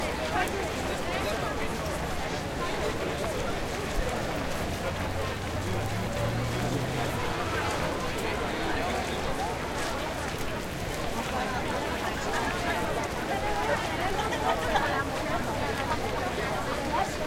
crowd ext medium walking quickly continuous steps good movement
crowd, ext, medium, steps